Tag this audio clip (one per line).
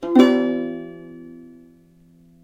musical-instruments
violin